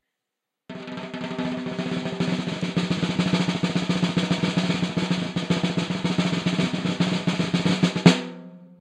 Snare roll, completely unprocessed. Recorded with one dynamic mike over the snare, using 5A sticks.